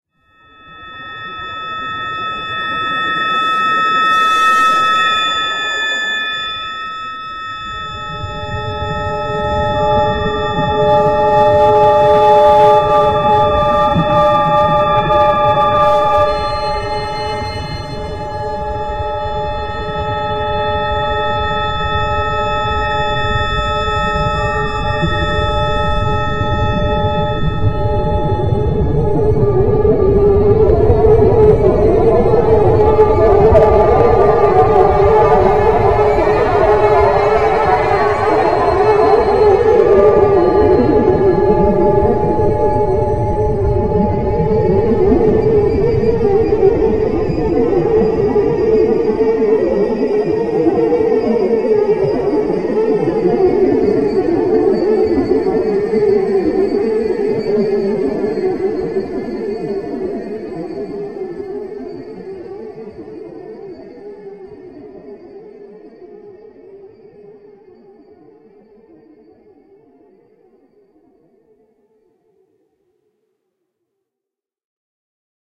How else are going to warn your characters about their imminent abduction?
alien-sound-effects, Film, Ambiance, Artificial, Alien, Space